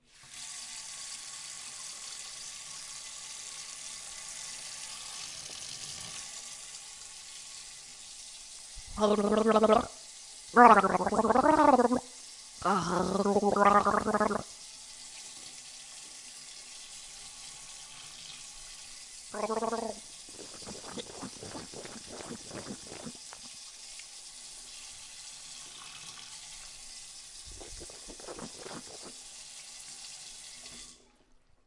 Gargling with water. Sound Recorded using a Zoom H2. Audacity software used by normalize and introduce fade-in/fade-out in the sound.